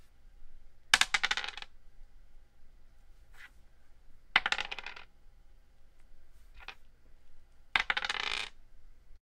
A plastic 6-sided die rolled on a wooden table.